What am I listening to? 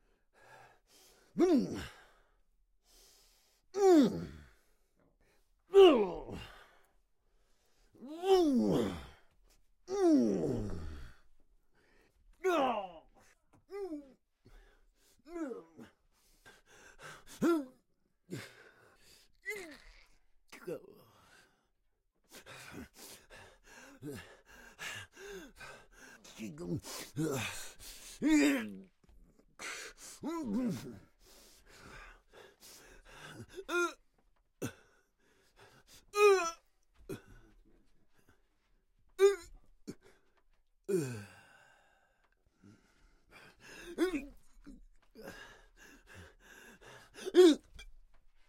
Fight Reaction Person 1-b
Male 65 y.o
punches, pain, fighting, aggression, hit, angry breath.